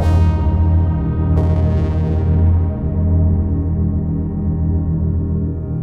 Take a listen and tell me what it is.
Analogue Pt1 13-4
analogue ringmod with a juno 106 sound as external carrier
percussive, ringmod, drone